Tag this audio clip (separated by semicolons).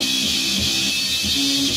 guitar; free; sounds; loops; drums; filter